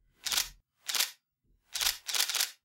Shutter release sounds made by a Nikon D5200.